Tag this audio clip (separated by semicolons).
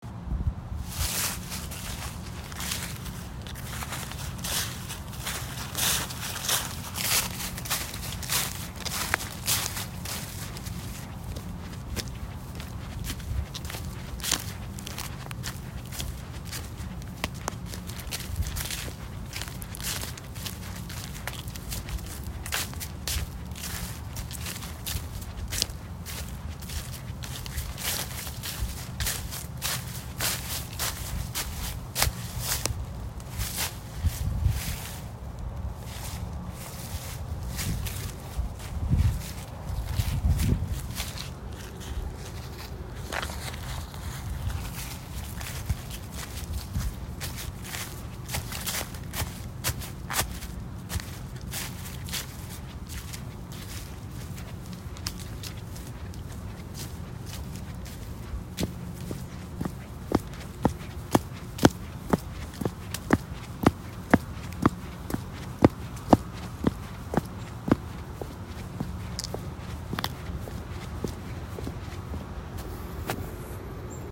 footsteps; hum; leaves; generator; fall; walk; walking; autumn; sidewalk